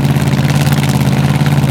Muscle Idle 2
Muscle car idling.
This sound has been recorded using a lavalier microphone and edited for loop optimization in FL Studio.
car, idle, motor, automobile, engine